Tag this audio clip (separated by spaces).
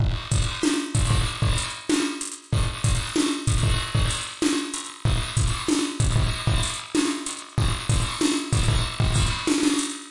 loop hat kick electronic drumloop hi-hat vst percussion 120bpm